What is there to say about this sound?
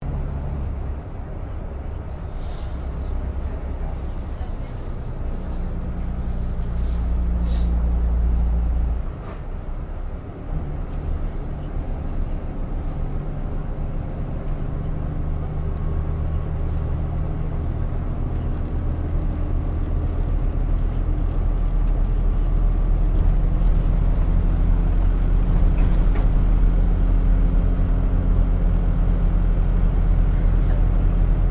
Simple bus ride at Lisbon, Portugal 2019.
bus, bus-ride, car